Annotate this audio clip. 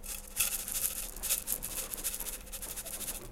Sounds from objects that are beloved to the participant pupils at the Primary School of Gualtar, Portugal. The source of the sounds has to be guessed.
Portugal, Escola-Basica-Gualtar, mySounds